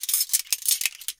Silverware in hands - rattling, moving, and shuffling.
Clatter, Shuffle, Cutlery, Rattle, Shake, Shudder, Sort, Silverware